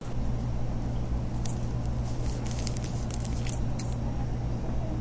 The sound of rubbing together salt and pepper packets, then dropping them onto a table at the CoHo, a cafe at Stanford University.
aip09, stanford, stanford-university, pepper-packet, salt-packet